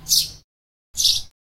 Record Chim Sẻ bird use Zoom H4n Pro 2018.05.22 11:40.